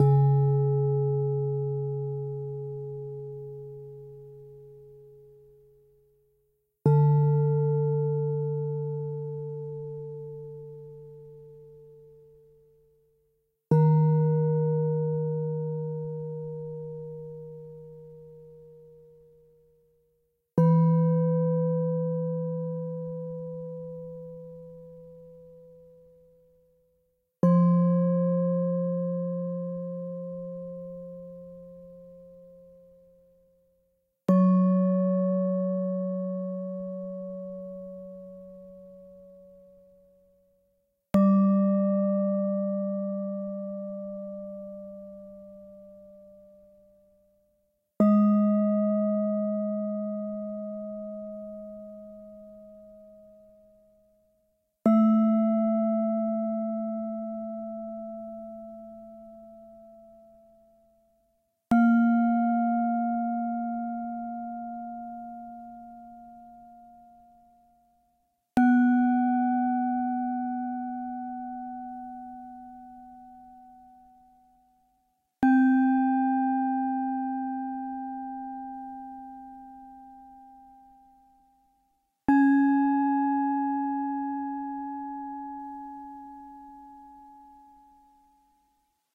Zen Gong (Scale C#)
This is a sample of a metal kitchen mixing bowl. The first note (C#, not perfect C#) is unpitched and recorded as is. The following notes are the original note pitched up 1 semitone each time to achieve a 13 note scale C# to C#.
bowl, sharp, dong, zen, ambient, notes, scale, percussion, peaceful, ding, peace, bong, chilled, metal, bell, C, gong